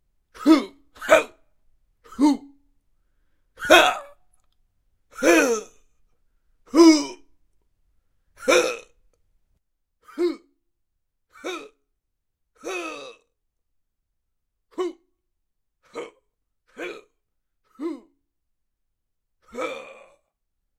I shout/scream around or something.
Me recording verbally straining myself? I don't know how to express what this is actually..
You can download pre-cut files of each 'shouting' see comments